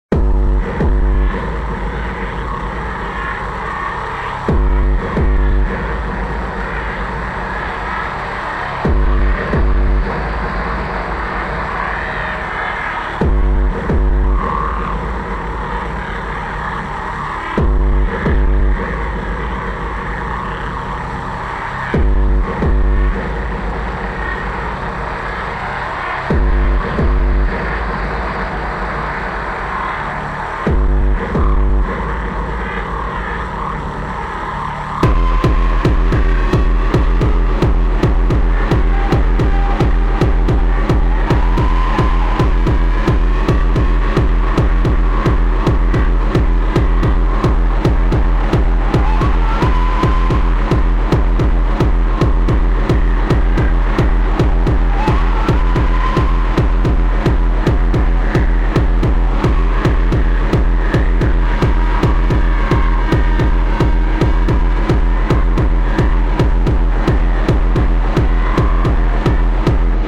Trapped in a ventilation system, a character is desperately trying to navigate the confusing tunnels while vicious aliens are hunting them down, until finally they are discovered and the chase is on! That's background story I made when creating this piece.
You can split the music at exactly 35 seconds to get the more tension-side of the music and the chase element of the music.
This was created using ACID Pro 7 relying on Sinnah VST plugin a fair bit, it's a great free plugin!